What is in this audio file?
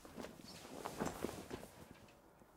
sitting on bed